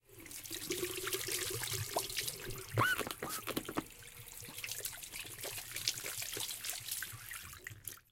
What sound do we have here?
Sound Description: washing hands with water and soap from dispenser
Recording Device: Zoom H2next with xy-capsule
Location: Universität zu Köln, Humanwissenschaftliche Fakultät, 214 ground floor
Lat: 50,933402°
Lon: 06.919723°
Recorded by: Marina Peitzmeier and edited by: Marina Peitzmeier